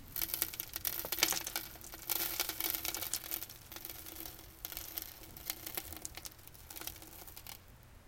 sand pour on wood FF669
sand, sand pour on wood, pour, pouring, pour on wood, wood